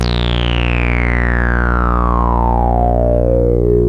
progressive psytrance goa psytrance